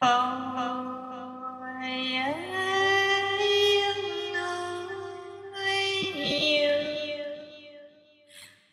Choinky Diva 001
Vocals in an imaginary language, processed and distorted.
vocals, alien, weird, female, high, processed, world